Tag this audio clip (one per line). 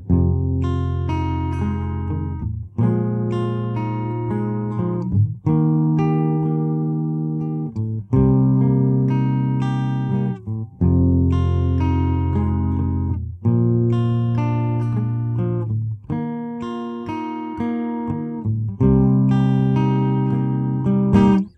Arpeggio
Guitar
Picking
Recording